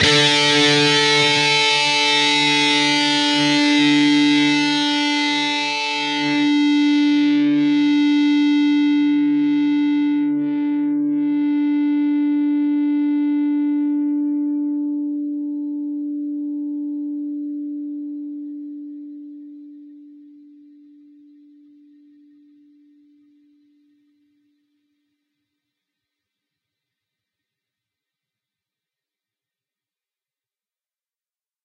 Dist Chr Drock 2strs 12th up
Fretted 12th fret on the D (4th) string and the 14th fret on the G (3rd) string. Up strum.
chords, guitar-chords, lead-guitar, distortion, distorted-guitar, lead